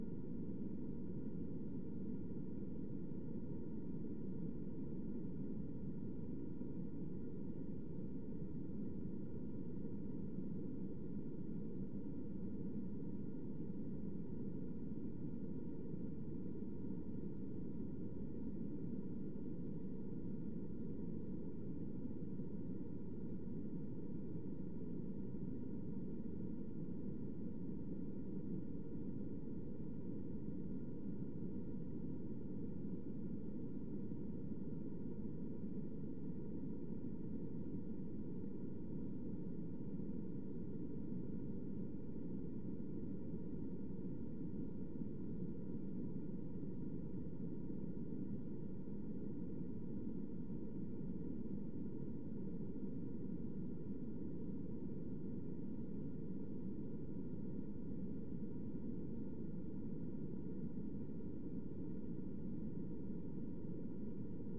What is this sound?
Ventilation Atmosphere
Generated using in loop a little fragment of one note of piano.
Note, Ventilation, Atmos, Drone, Piano